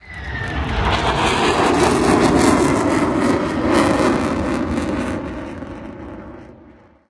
Celebrations took place in İzmit yesterday (on 25 June) on the 101st anniversary of its liberation during our war of independence against occupying forces. I recorded this fighter jet during its flight with TW Recorder on my iPhone SE 2nd Generation and then extracted some sections where not much except the plane itself was heard.